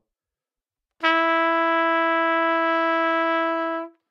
Part of the Good-sounds dataset of monophonic instrumental sounds.
instrument::trumpet
note::E
octave::4
midi note::52
good-sounds-id::2833